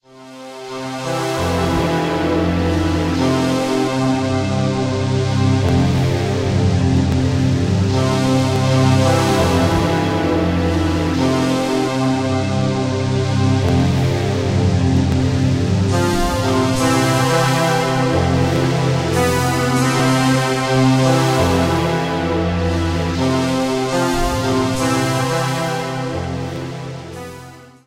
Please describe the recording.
kind of chariots of fire
Poor attempt at trying to make some Chariots of Fire style music. Warning: I didn't get anywhere close to succeeding!
ambience, atmosphere, electro, music